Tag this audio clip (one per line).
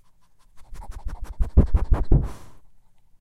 smelling
sniff
breathing
dog
sniffing
sniffing-dog
smell